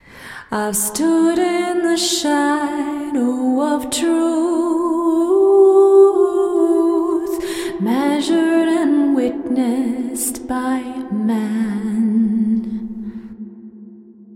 woman singing "I've stood in the shadow of truth"
Woman singing "I've stood in the shadow of truth, measured and witnessed by man".
Recorded using Ardour with the UA4FX interface and the the t.bone sct 2000 mic.
You are welcome to use them in any project (music, video, art, etc.).
Original file where clip was used:
female-vocal, woman-singing, science, singing